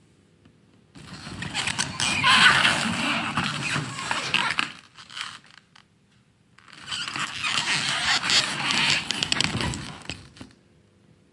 Noisy sliding door
Noisy sliding plastic door.
How it was created: It's a recording of the sliding door of my living room. Recorded by me on a cell phone Samsung J5
Recorder used:"Grabadora de voz" Version 20.1.83-92
Software used: Audacity to reduce noise, cut and export it
sliding, door